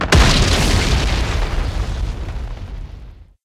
very large sounding explosion.